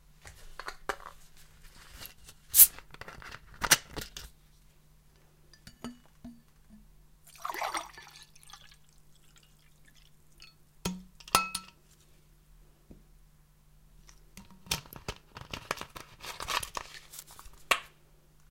Drinking soda
This is a sound recorded by a studio-mic. I made it by using a bottle and a glass.
drink, drinking